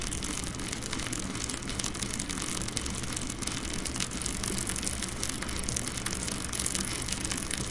Bike Chain Peddling
A close up recording of my bike chain pedalling while the bike was stationary.